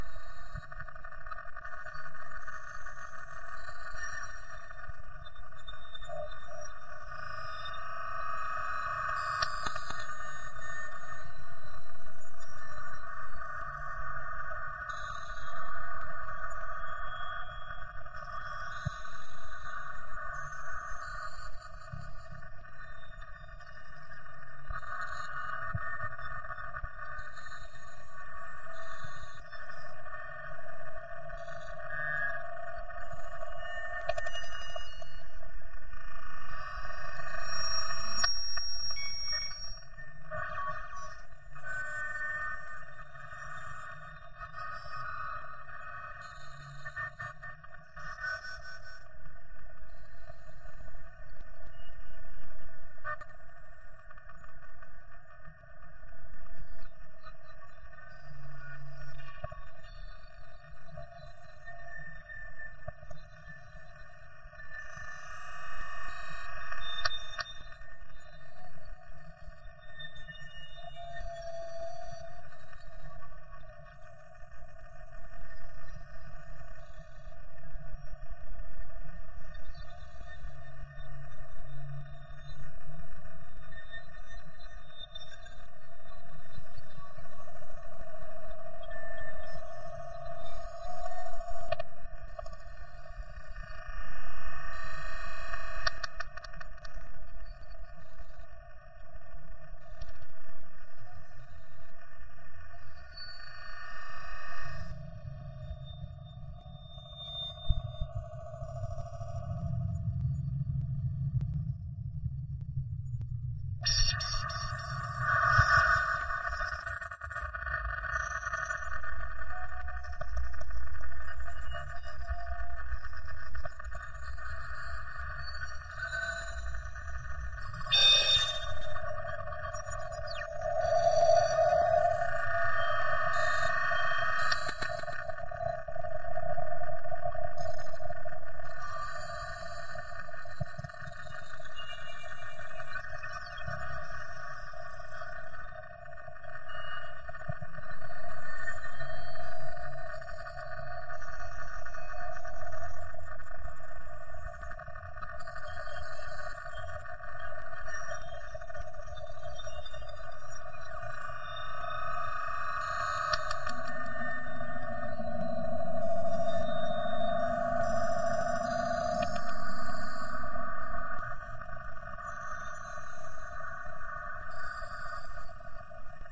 A couple of variations that began with simply feeding back the various modules in Gleetchlab upon themselves. There is no external output but there is some Reaktor effects for good measure.
noise, glitch, gleetchlab, digital, reaktor, space